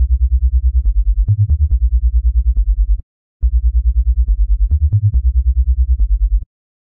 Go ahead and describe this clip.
basswobble1step
Bass wobble that oscillates every beat at 140bpm.